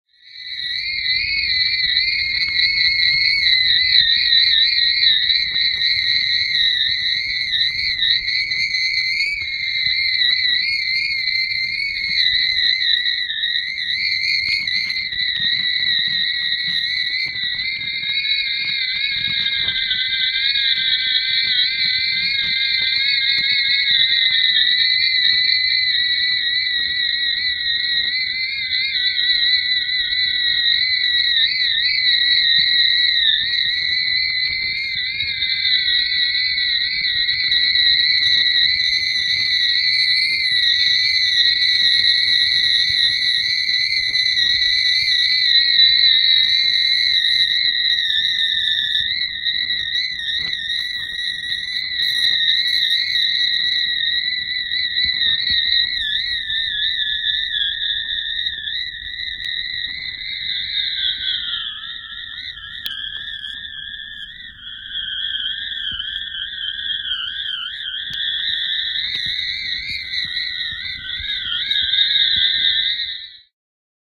Kite above San Francisco Bay, as heard by its string. Recorded with piezo transducer taped to the side of the string spool, connected to Nagra ARES-M-II recorder.